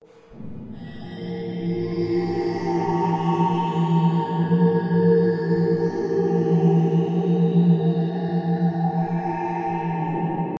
Some creepy ambience, recorded vocal by me, processed.